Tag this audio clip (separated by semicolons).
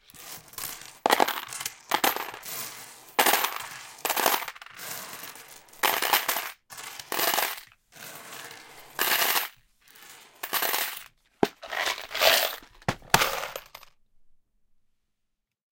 box; money